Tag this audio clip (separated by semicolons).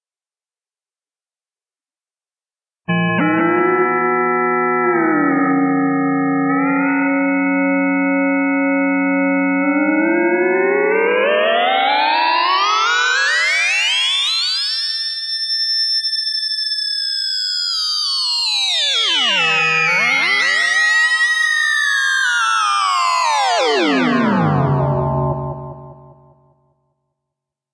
lonely; bend; pitch; synth